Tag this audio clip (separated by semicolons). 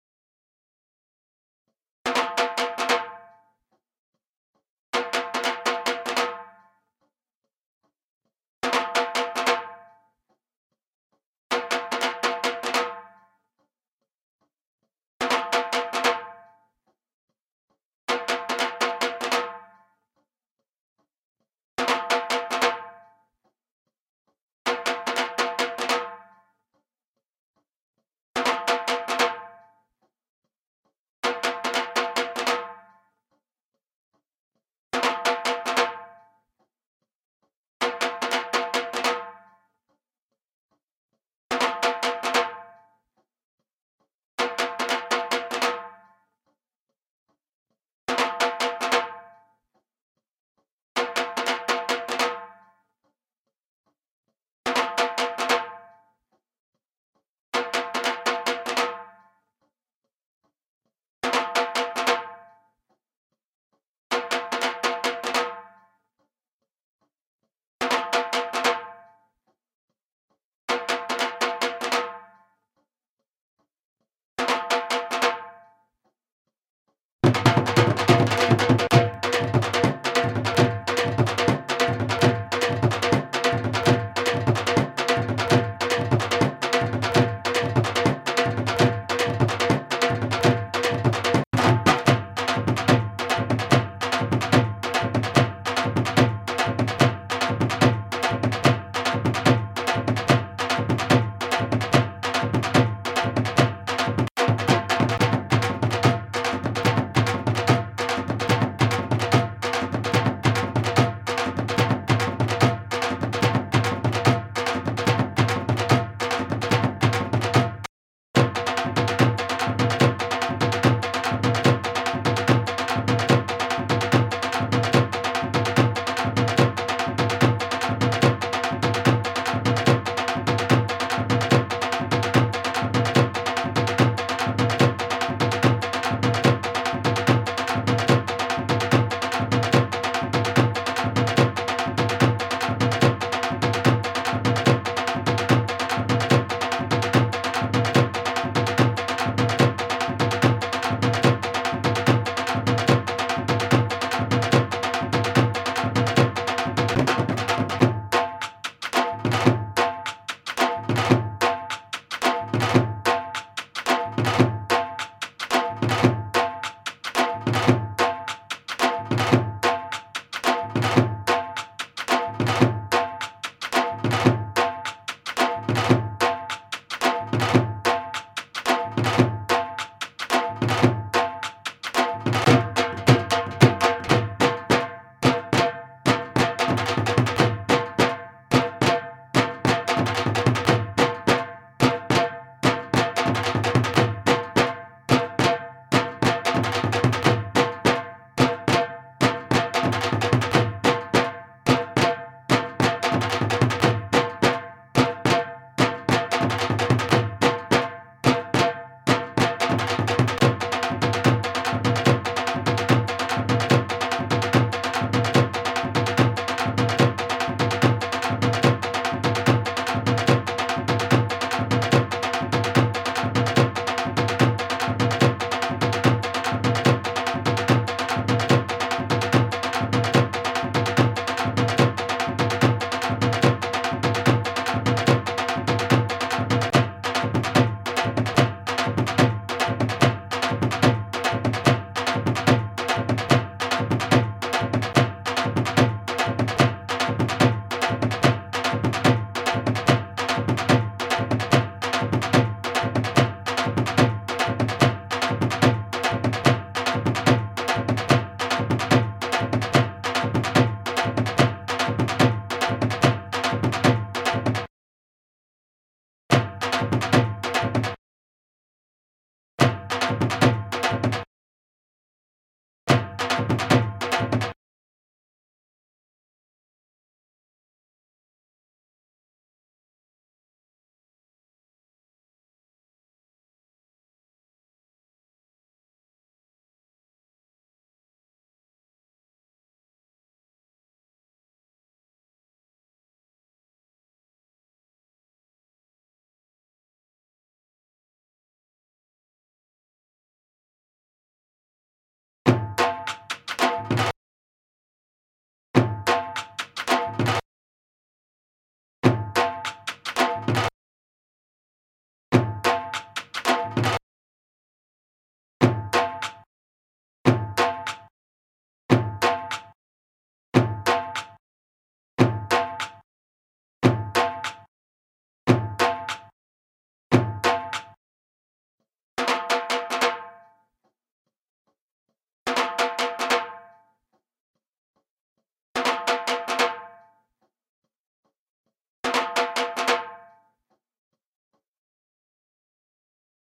Foundation; Doll; Indian; Tabla; Drums; Kalsi; Percussion; Bhangra; Drumming; Dohl